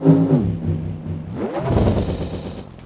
Low-pitched delay warp rising to quick echo.Taken from a live processing of a drum solo using the Boss DM-300 analog Delay Machine.
glitch, warped, lofi, analog